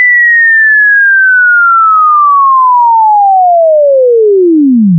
Cartoon Falling....falling
A cartoon slide whistle created from a sine wave in Audacity.
slide, falling, toon, spring, boing, sproing, slide-whistle, fall, cartoon, whistle